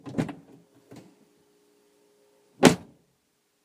Opening-Closing Truck 2
auto, automobile, car, diesel, door, drive, driving, engine, ford, gas, gear, motor, open, race, race-car, racing, revving, start, truck, vehicle, vroom